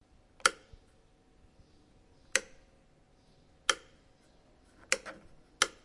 H4 - interruptor luz - light switch
click, interruptor, luzlight, switch